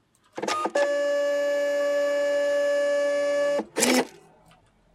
Label Printer - Printing a Label
A labeled label-printer printing a small printed label.
electronic, labeled, printer, printing, label, print